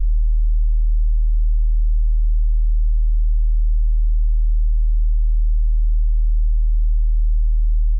Deep Bass 01
An 8 second deep bass, highlight at 20 hz to 30 hz, with a rapid fade in and out. Made from a sine / sinusoid wave, 2 octave below C4 (at C2).
Useful for bass / sub / woofer effect.
sub, bass, deep, 30hz, 20hz, C2, sine, loop, sinusoid, rumble, woofer